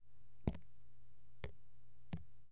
Water On Paper 15
Drops on paper.